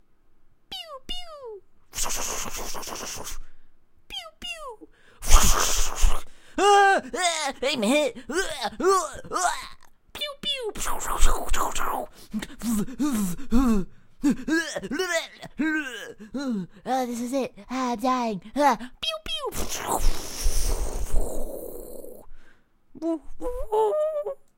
100% REAL war sounds, recorded on field !!!
Recorded on the field of war, truly harrowing. listener's discretion is advised.
battlefield, bleeding, blood, bullets, crying, death, dying, horror, monster, real, scary, scream, screaming, war